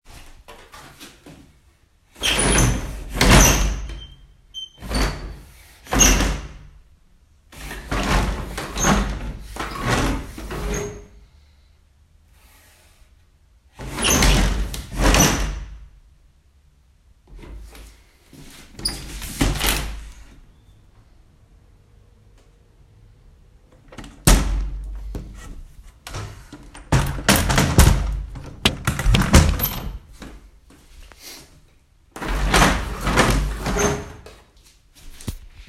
Me opening and closing wooden blinds. Recorded with an iPhone mic.
open, blinds, close